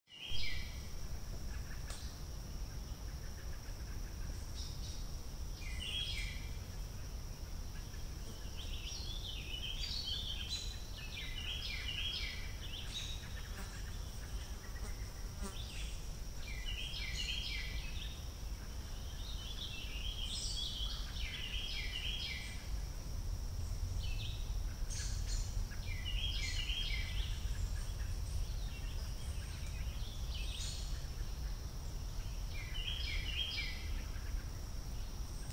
palmyra Cove July 5 2021 2
Recorded with a phone in Palmyra Cove Nature Preserve, Palmyra, NJ, USA, in July 2021. Edited with Adobe Audition.
ambiance, nature, jersey, forest, birds, USA, field-recording, new